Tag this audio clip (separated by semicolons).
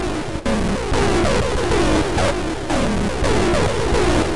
distorted; hardcore; harsh; noise; noisecore; overdrive; overdriven; stabs; techno